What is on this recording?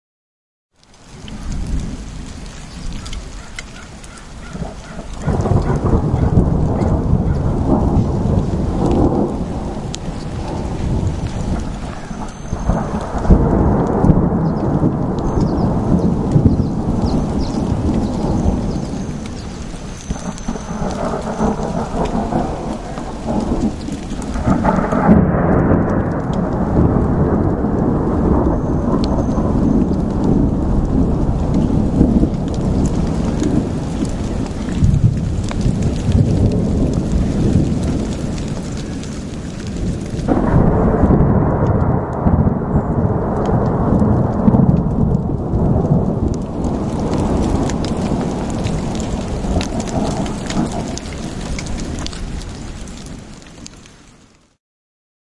br Thunder3
Recorded in Colorado mountains with some great echos.